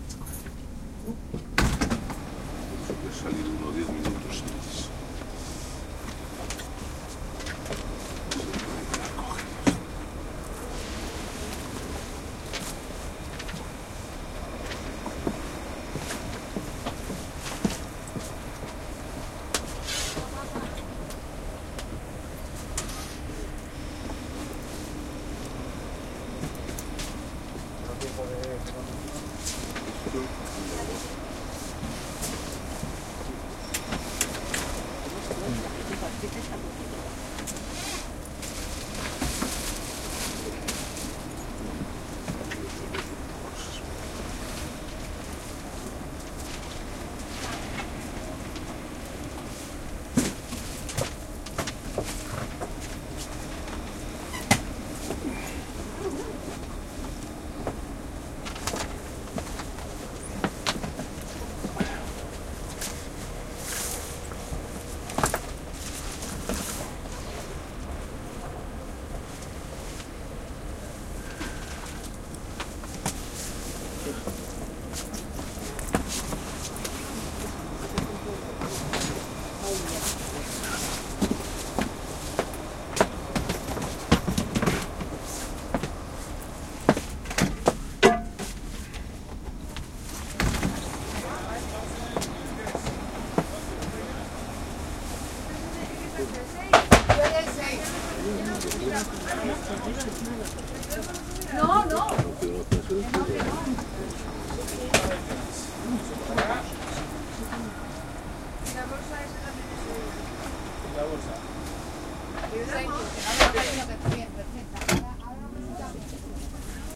20100129.train.car.inside
ambiance inside train car, few minutes before departure. Doors opening and closing, voices speaking in Spanish. Recorded at Santa Justa Station, Seville, Spain. Olympus LS10 internal mics
railway, train